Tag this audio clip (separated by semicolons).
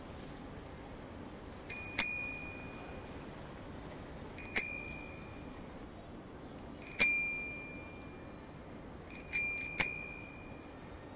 Japan; cycle; Station; mechanic; bicycle; metallic; metal; Chiba; bike; ring-ring; Matsudo; ring; ringing; bell; iron